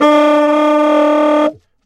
Tenor Sax d3
The format is ready to use in sampletank but obviously can be imported to other samplers. The collection includes multiple articulations for a realistic performance.
woodwind, sampled-instruments, tenor-sax, jazz, saxophone, sax, vst